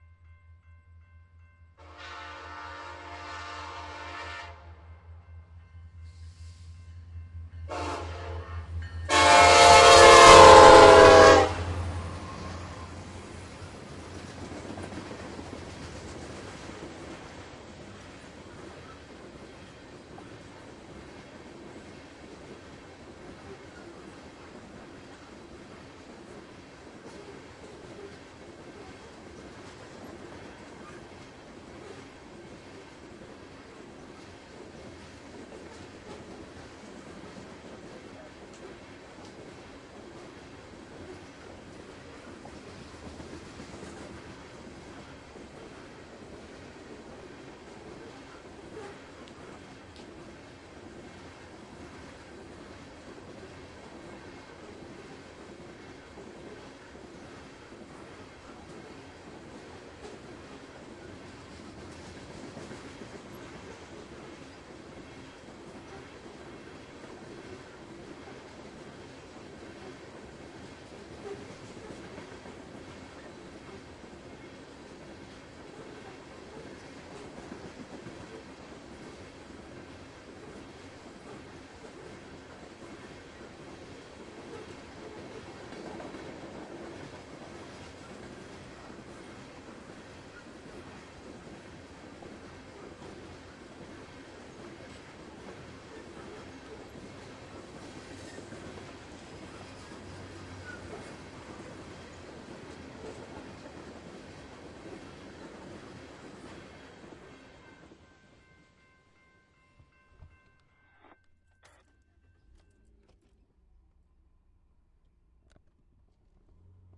BNSF Coal East at Bristol IL Track 1